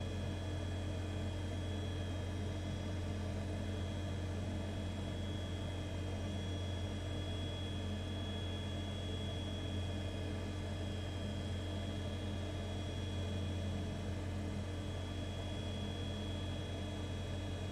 External SCSI storage enclosure noise-drone
Hard-Disk-Drive, Noise, Loopable, Drone, Computer, HDD, Retrocomputing, Disk-Drive, Old, Computer-Fan